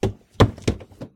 Footsteps-Stairs-Wooden-Hollow-06
This is the sound of someone walking/running up a short flight of wooden basement stairs.
Wood, Run, Step, Hollow, Wooden, Walk, Stairs, Footstep